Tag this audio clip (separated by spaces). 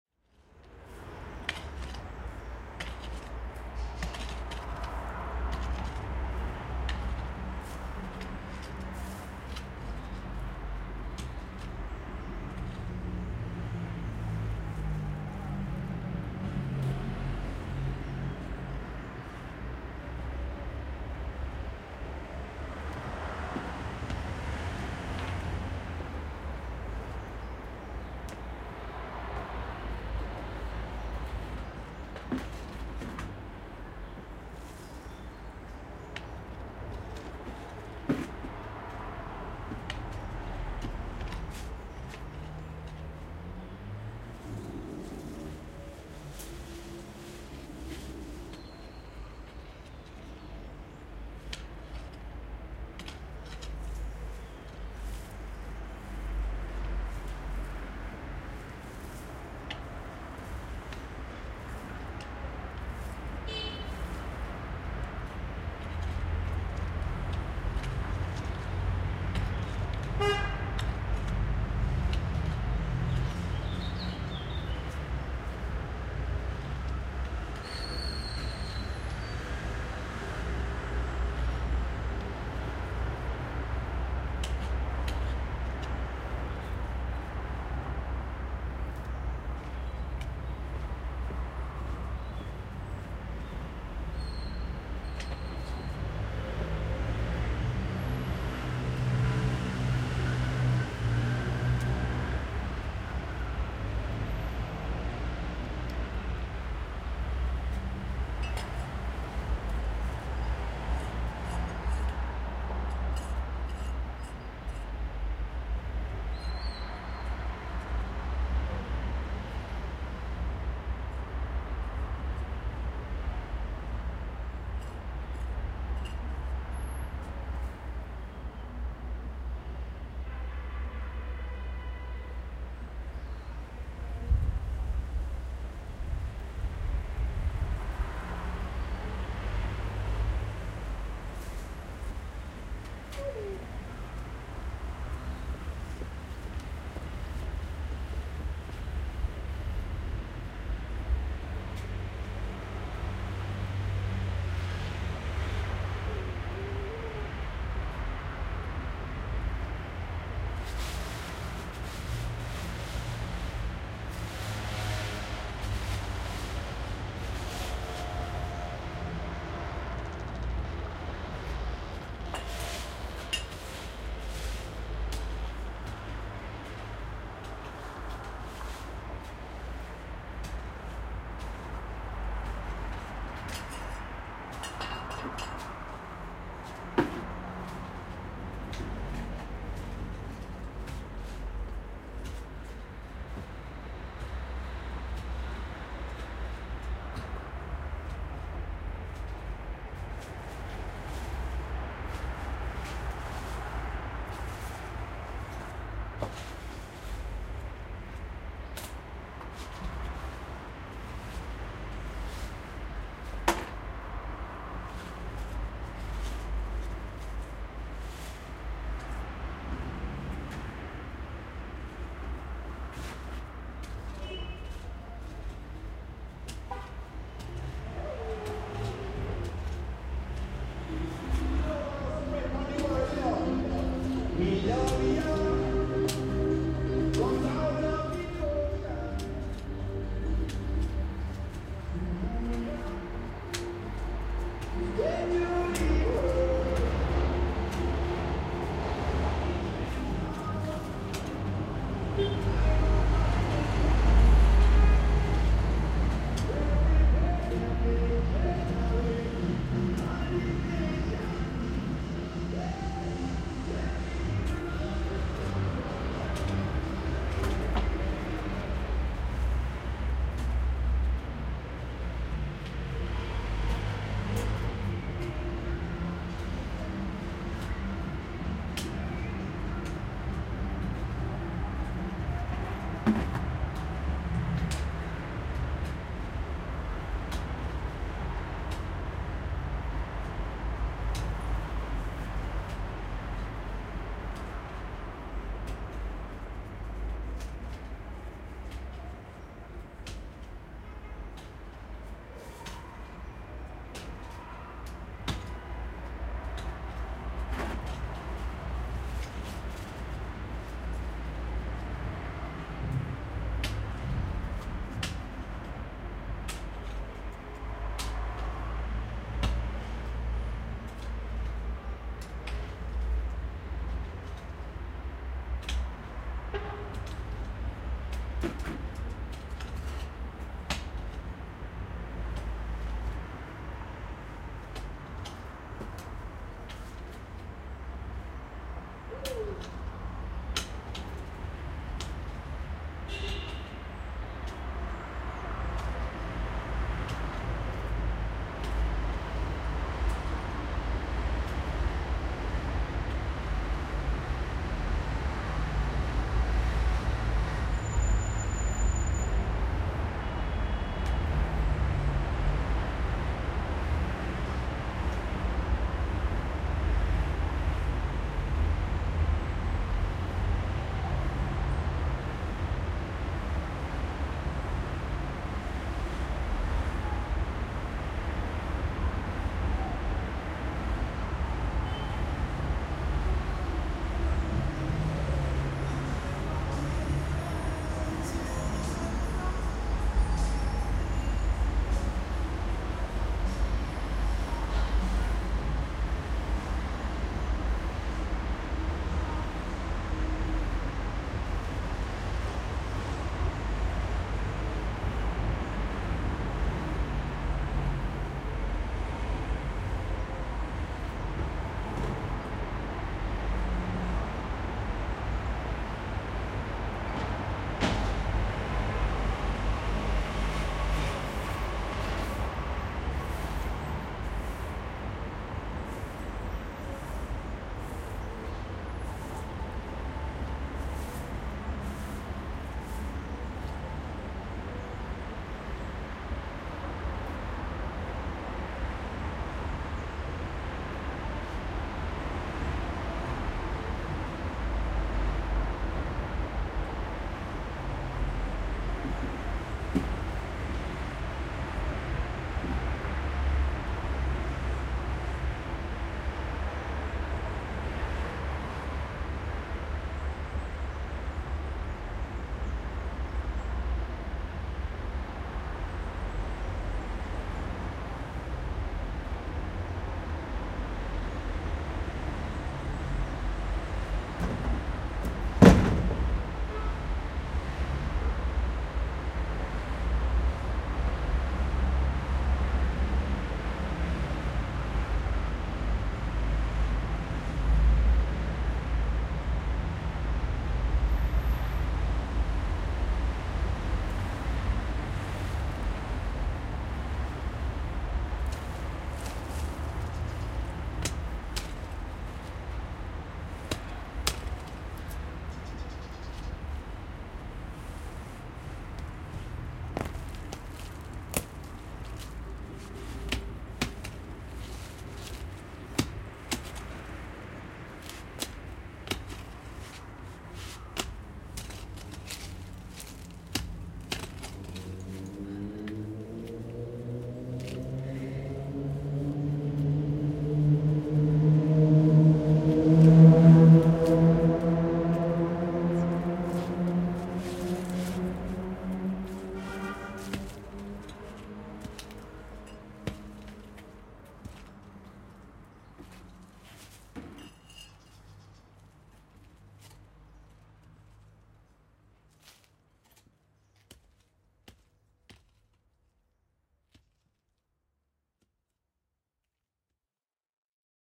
busy-street field-recording palermo soundscape sunday-afternoon